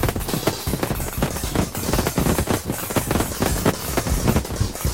Techno retardo drums shmorfed into gurglingness.
glitch, syth, grains, granular